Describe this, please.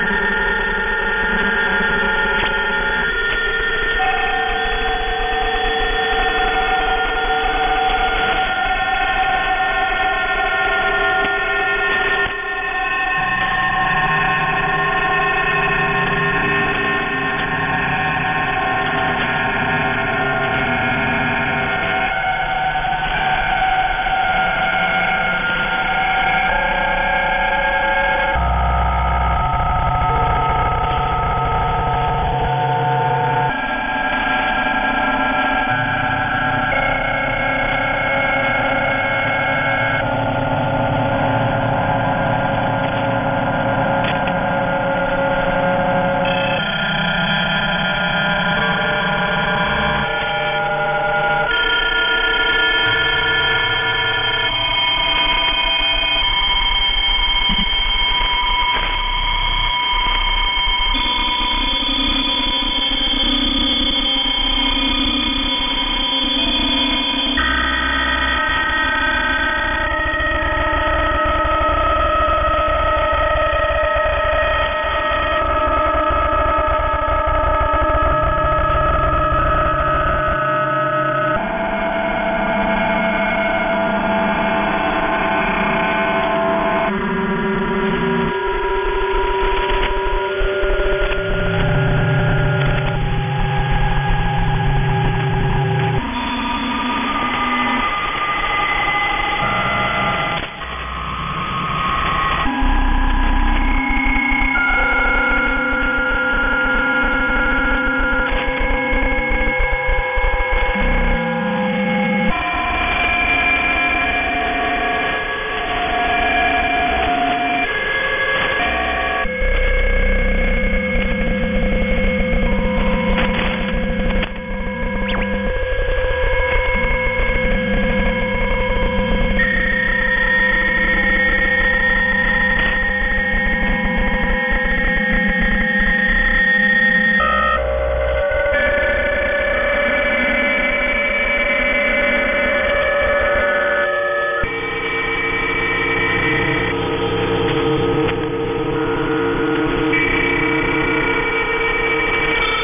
PSK31 multiple on 14071.0kHz AM wide
Made using the online remote shortwave receiver of University of twente in Enschede Holland:
Made in the part of the 20-meter ham band where PSK31 is the dominant digital mode, with the receiver deliberately mistuned, in AM mode at it's widest setting to get a mishmash heterodyning sound.
electronic, sci-fi, dare28, drone, noise, heterodyne, ham, ham-radio, digital-modes, radio, shortwave, AM, mishmash, psk31, digital